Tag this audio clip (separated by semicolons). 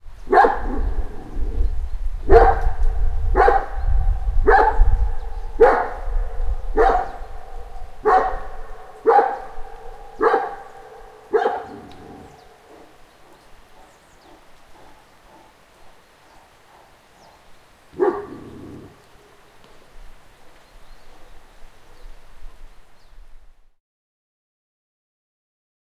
barking; dog; echo; mountains